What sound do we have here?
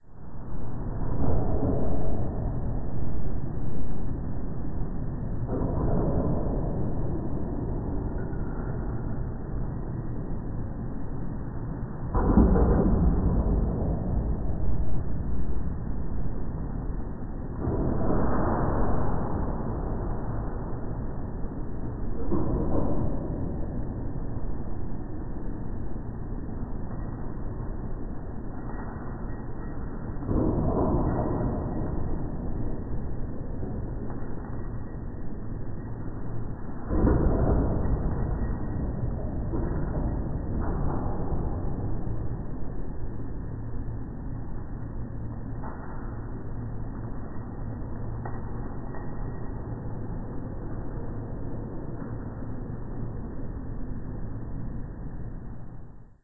Abandoned Metro Tunnel 04
Abandoned Metro Tunnel
If you enjoyed the sound, please STAR, COMMENT, SPREAD THE WORD!🗣 It really helps!